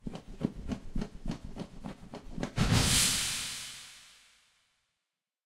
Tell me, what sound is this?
CR ExplodingRobin
Sound of Robin in flight and exploding
explosion, bird, flapping, robin